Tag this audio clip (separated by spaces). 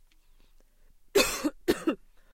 cough ill coughing cold